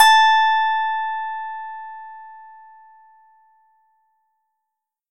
Celtic Harp -A5
All sounds are created with the pluck-.function of audacity.
I modified the attack phase, changed some harmonics with notch-filter and
Lowpass.
For the pluck noise I used a bandpass- filtered white noise.
Ethnic, Celtic-Harp, Koto, Plucked, Harp